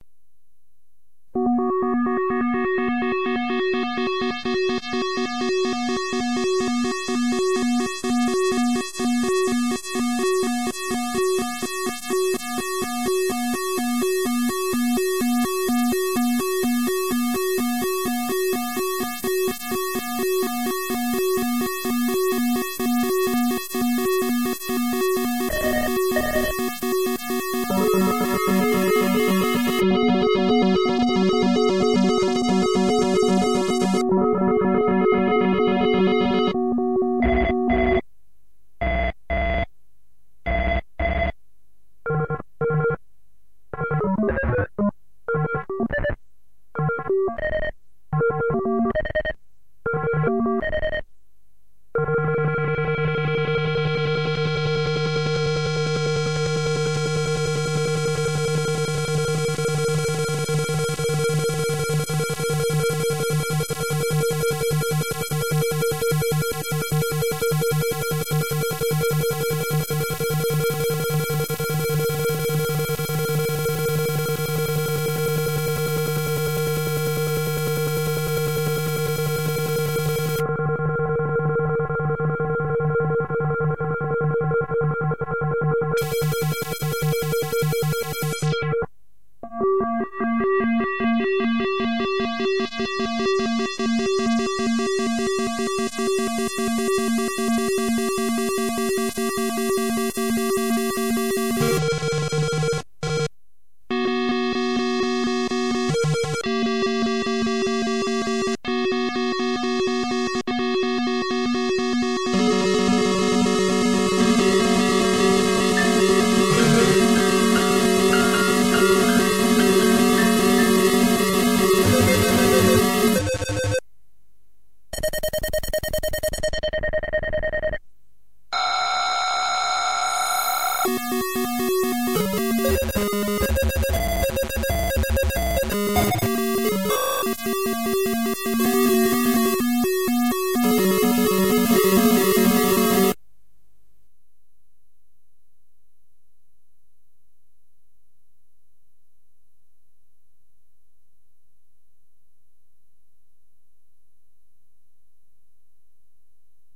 scifi alarm 2 - scifihalytys2
scifi alarm made with nordlead 2 and edited with fostex vf16.
scifi, alerts, imaginary, alarm, synthetic